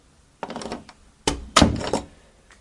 door close
Closing the wooden bathroom door in the hallway of my house, on 5/27/17. Recorded with a sony icd-px333. I think the doorknob might be of brass or some cheap light metal like aluminum, but the sound is pretty good, I think. The doorknob is rather noisy.
close, door, noise, slam, wooden